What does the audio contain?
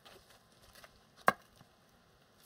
Footsteps Cane hitting Pavement
Footsteps and cane, walking across the sidewalk.